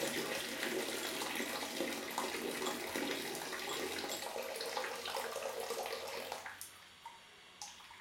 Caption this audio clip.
water from tap 2
Sounds recorded inside a toilet.
water, bathroom, indoor